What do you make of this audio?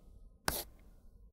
Pen on paper.
{"fr":"Raturer 02","desc":"Raturer au stylo à bille.","tags":"crayon stylo rature"}